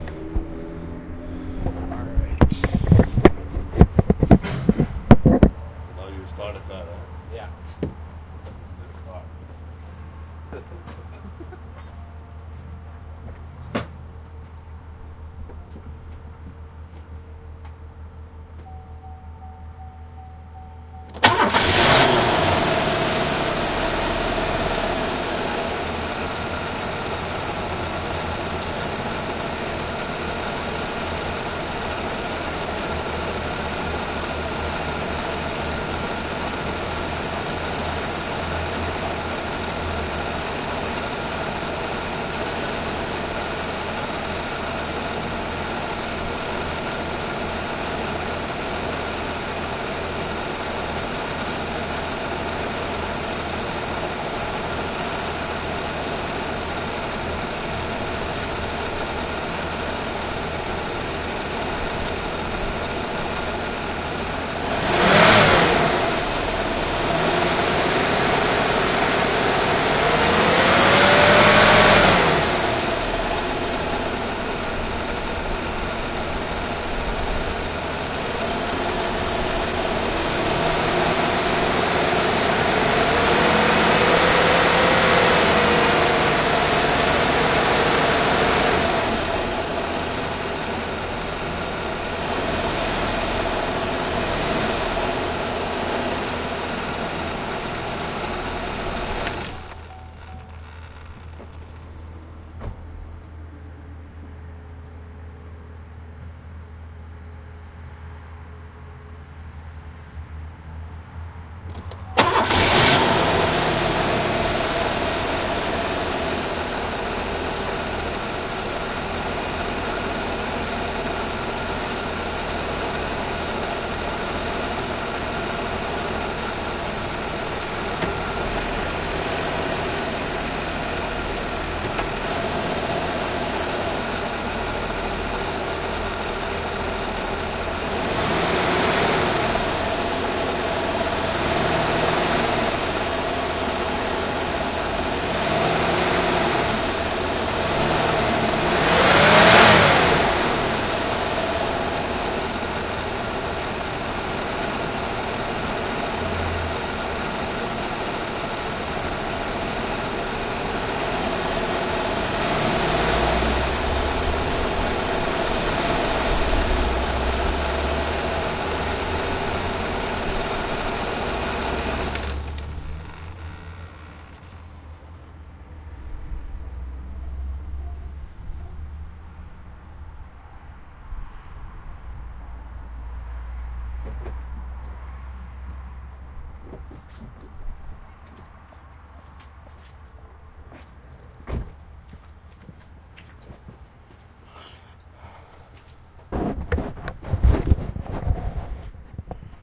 The engine of a Pontiac Bonneville starting, running, accelerating while in idle, turning off. Outdoor. Recorded Zoom H2